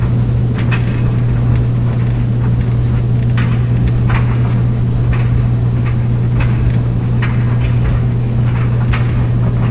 Dryer loop (slightly clicky)
Laundry day!
Running clothes dryer with some metallic noise along with the soft clothing.
Part of my Washer-Dryer MacPack.
dryer, loop, washer